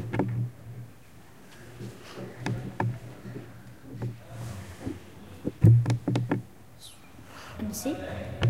Ecole Olivier Métra, Paris. Field recordings made within the school grounds. Someone plays the metal staircase with a wooden spoon.